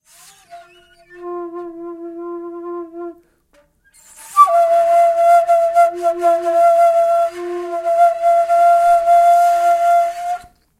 Kaval Play 15
Recording of an improvised play with Macedonian Kaval
Acoustic, Instruments, Kaval, Macedonian